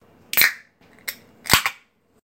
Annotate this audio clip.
Soda can being opened
can, open, soda